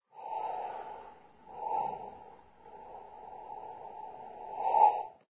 viento largo
sonido largo de viento
wind, improvise